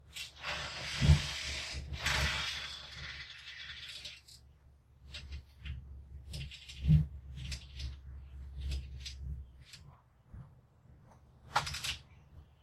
The rusty screen door of an old house in the mountains gets caught in the breeze; it swings open and bobs open and close a couple times before closing. Recorded with an SM-57